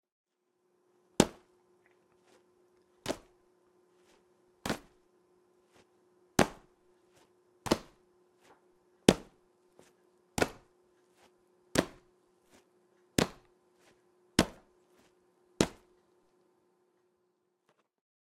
Boot Stomp; soft
A boot lightly stomping on the floor.
boot, foley, stomping, stomp, step, footsteps, steps, foot, foot-steps